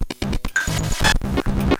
JCA loop-04
short idm loop from my circuit-bent casio CT460
abstract, circuit-bent, electronic, experimental, glitch, idm, loop